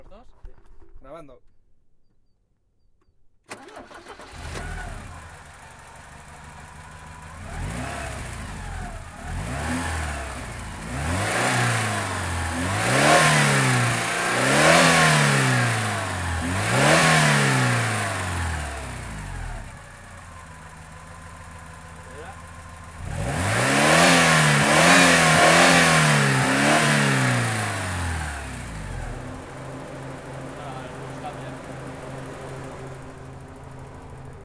Sports car engine.
Recorded with my Zoom H4n